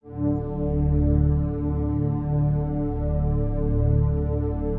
100 Concerta String 03
layer of string
piano, string